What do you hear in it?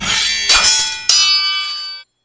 sword battle jingle loop
if you can develop this to percussion please give me a hint.
battle, jingle, loop, percussion, sword